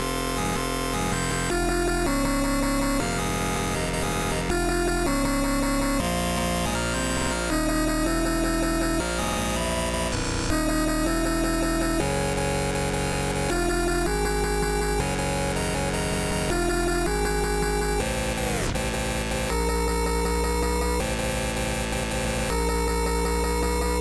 dubstep riff wobble synth 160BPM
Some nasty wobble basses I've made myself. So thanks and enjoy!